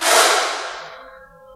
Bonks, bashes and scrapes recorded in a hospital at night.
hit, hospital, percussion